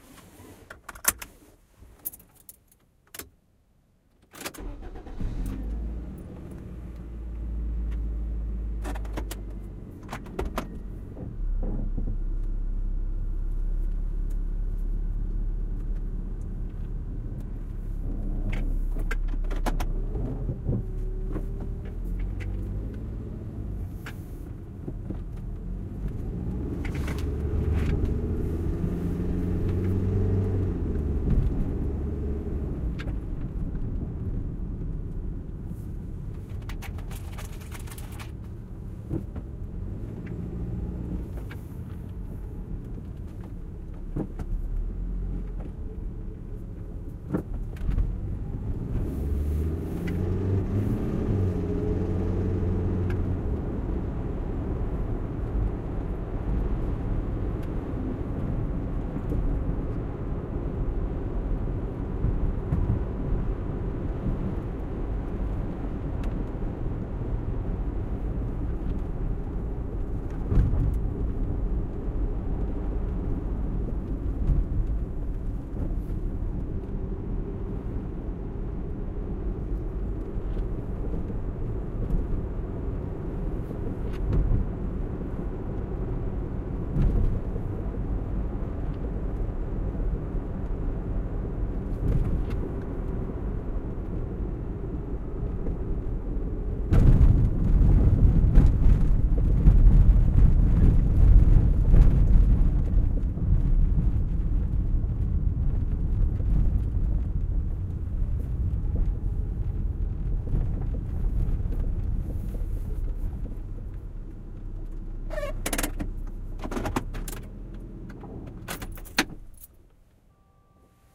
Geo Prizm interior takeoff and drive around 2
Geo Prizm - seatbelt click - keys turning - startup - back up - drive away - bumpy roads - keys rattling - thumping sounds
accelerating, binaural, car, car-engine, crappy, drive, engine, geo, inside, interior, nasty, old, rough, rugged, run-down, sound, startup